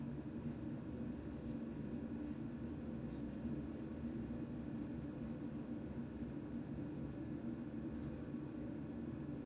the noise of a big water warmer
h4n X/Y
noise, warm, water